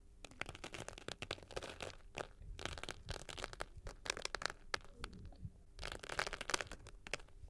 Rock fall: climbing a mountain and little rocks fall, walking on gravel. OWI. Recorded with a Rode Ntg-2 dynamic microphone and Zoom H6 recorder. Post processed to deepen the sounds. Recorded in a sound booth at Open Window Institute with lots of tiny rocks.